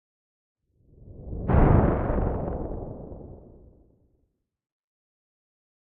Synthesized Thunder Slow 04
Synthesized using a Korg microKorg
synthesis, lightning, weather, thunder